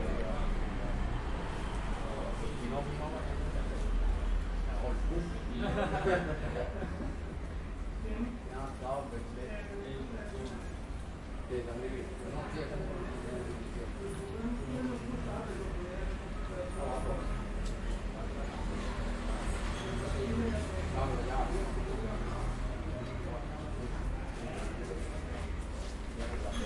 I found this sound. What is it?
Typical sounds of a exterior gameroom. Gamers´s voices and bets